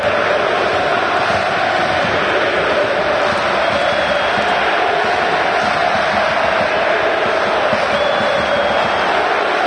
Sounds from a football game (soccer)